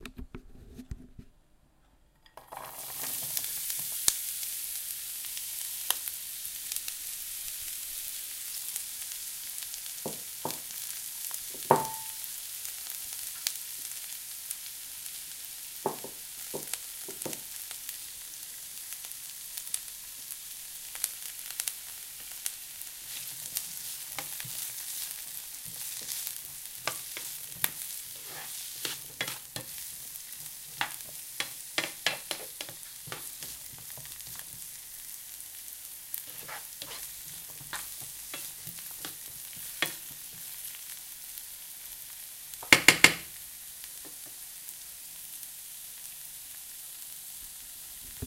Frying an omlette
Small sound of an egg beeing fried
pan, food, oil, cook, sizzle